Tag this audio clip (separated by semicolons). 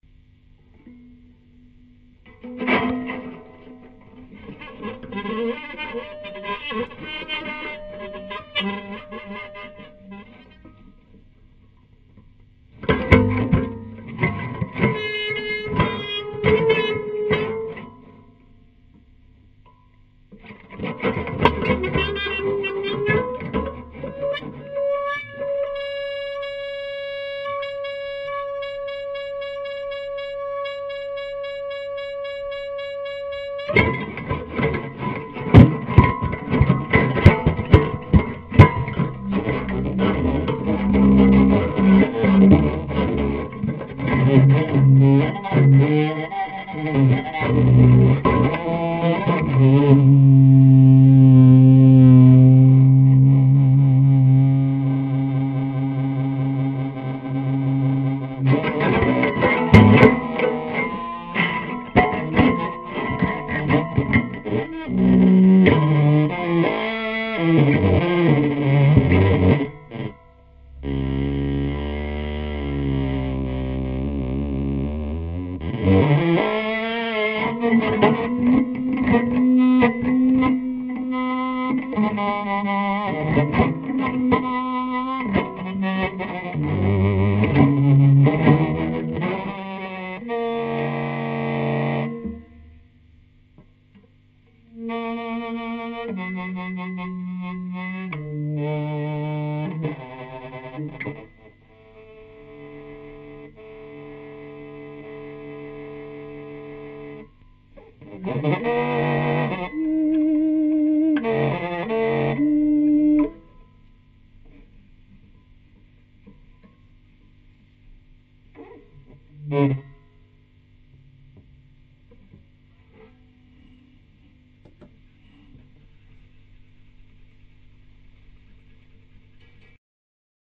guitar; lo-fi; prepared-guitar